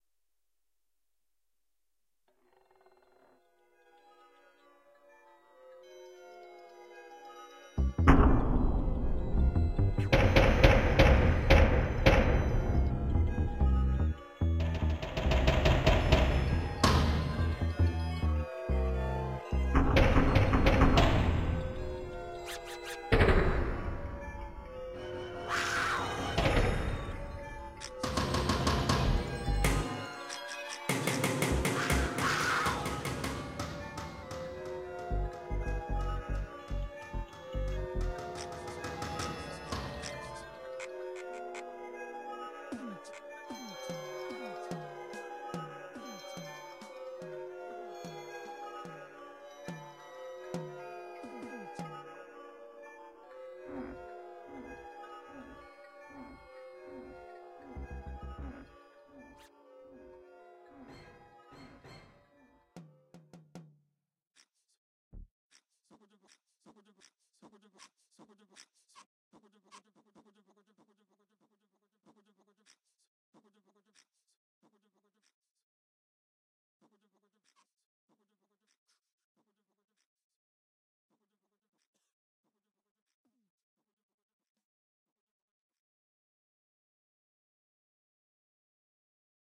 A short recording played on MIDI guitar....sounds that make me feel uneasy....SCARY!
guitar, nightmare, halloween